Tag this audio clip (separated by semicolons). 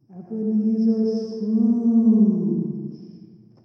carol; christmas; ebenezer; scrooge